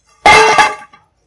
Duyên drop bowl. Record use Android One smart phone 2020.12.21 15:00
bowl, drop, fall, hit, kitchen, metal